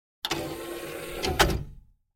A closing cd-player tray.
Recorded with the Fostex FR-2LE and the Rode NTG-3.